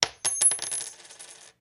Silver Quarter 3
Dropping a silver quarter on a desk.
Coin, Currency, Desk, Drop, Money, Quarter, Short, Silver